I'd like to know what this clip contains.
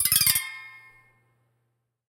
The sound of an egg slice cutter. I've 'played' an arpeggio on the strings of it. Recorded with an AKG C2000b.
slice, egg, kitchen, string, cutter